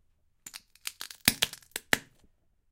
breaking shards of glass on a concrete floor under a boots heel
recorded with zoom h6